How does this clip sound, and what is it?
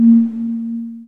Short button sound. Recorded, mixed and mastered in cAve studio, Plzen, 2002
ambient, button, short, switch, hi-tech, press, click, synthetic